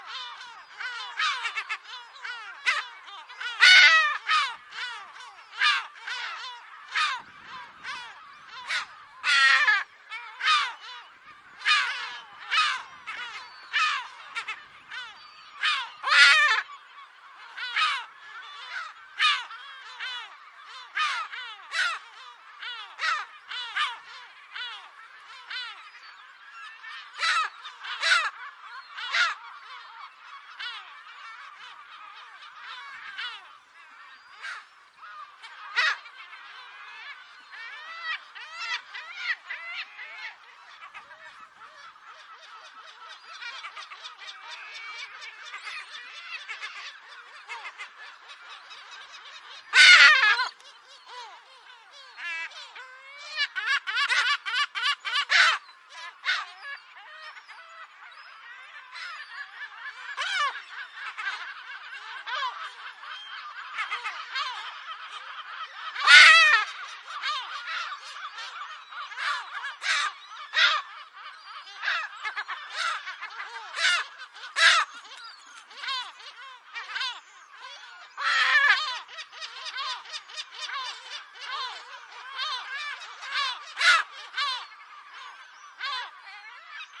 Black Headed Gulls Swooping
Breeding and attacking seagulls recorded on Flat Holm Island in the Bristol channel UK. Recorded using OKM binaurals.
birds, field-recording, wild-life, seagulls